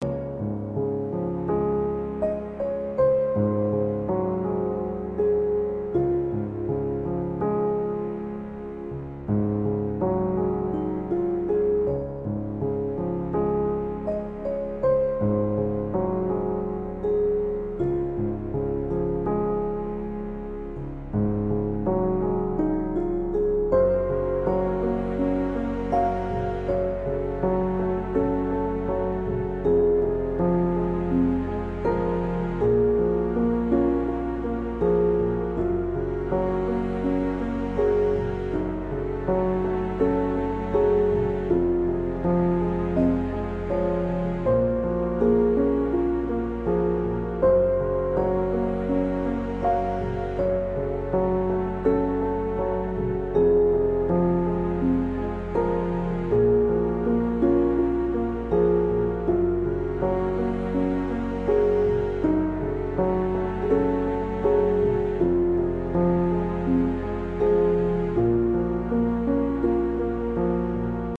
Relaxing Piano Music (Loop)
Remixed tracks:
Track: 53
Genre: Piano
I'm experimenting with 4th minor chord in the major scale. Still working for full music.
Chill, Music, Orchestra, Piano, Relaxing, String, Woodwind